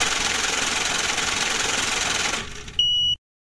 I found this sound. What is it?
Using the JB LUXX money counter this sound effect was recorded using the V8 Sound Card and an omnidirectional patterned condenser mic.
counting, money, OWI